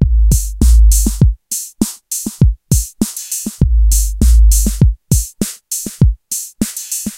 Just some beat